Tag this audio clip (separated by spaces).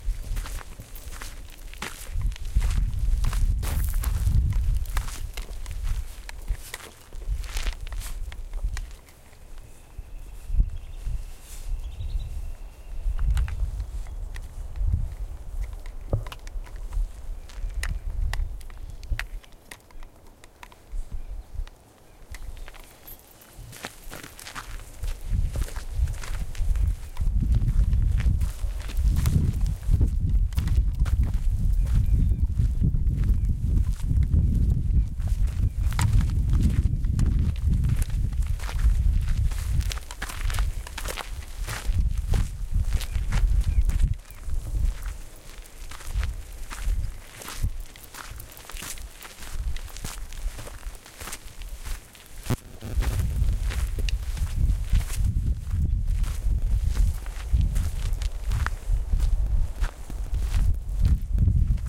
footsteps hiking walking